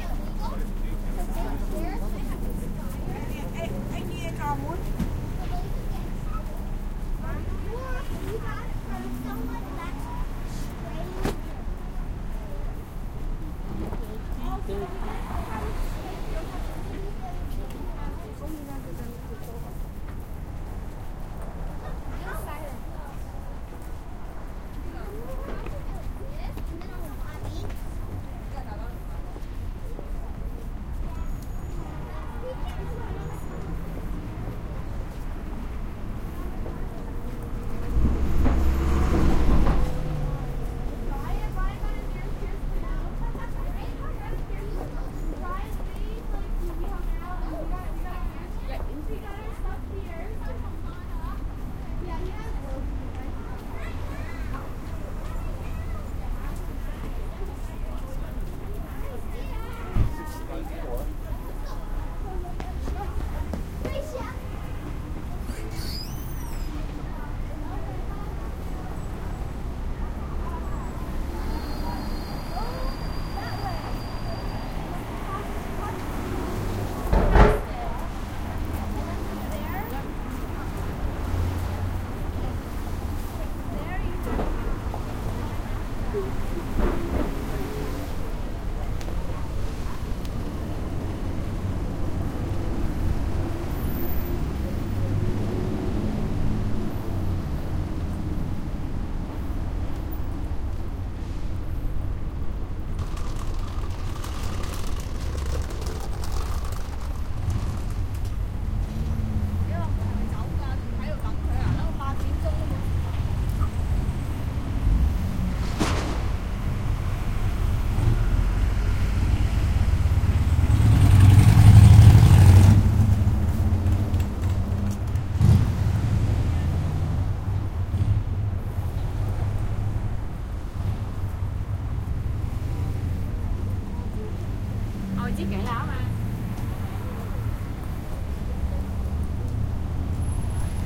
Walking around on Canal St.